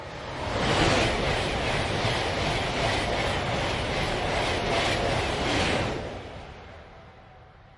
Fast Train passing R-L
A German ICE train passing at high speed from right to left. Recorded with a Zoom H2n.